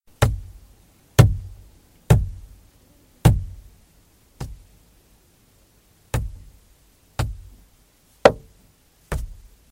Bashing, Car Interior, Singles, A
Raw audio of bashing several times on the interior of a car. This version is with single bashes at regular intervals.
An example of how you might credit is by putting this in the description/credits: